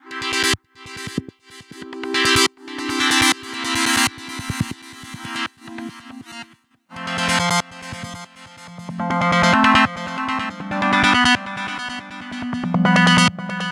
Oblong - FUNX STEM
I think this is a side chain out put I did this with a Yamaha Motif keyed off a un known BPM beat. Maybe 120 or 140.